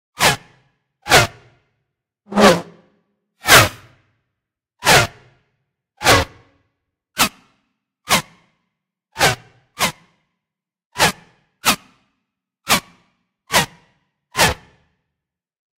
Bullet passbys
Bullets whizzing by.
Pitched and time shifted layers of cars passing. All then dumped into a sampler and run through multiple, randomized LFOs which subtly varied the pitch, time, and saturation on each playback.
It's pretty easy to build up a nice catalog of unique bullet whizzes this way versus cutting each by hand.
whiz-by, whooshes, gun, firearm, pass-by, Bullet, ricochet, whizzes, whiz, Whoosh